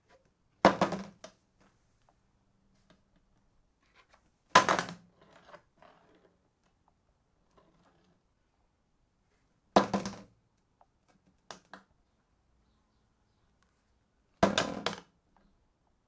plastic bucket drop
the sound of an empty plastic bucket falling over on a tile floor.
something I quickly recorded during the night to reach a deadline in time.